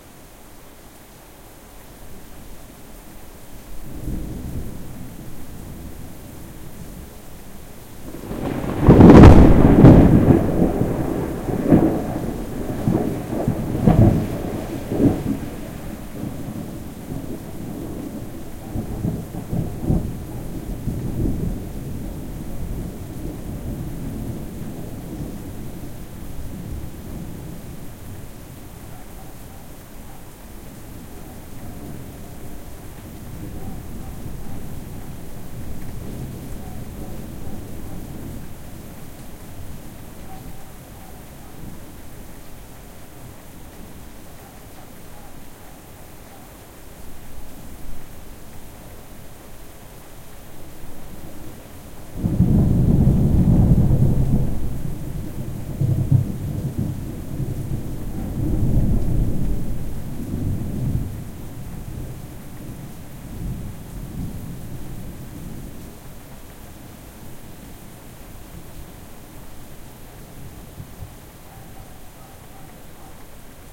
rain thunder2
thunderstorm, thunder-roll, thunder-storm, thunder, strike, storm, field-recording, lightning, thunder-clap, rain, weather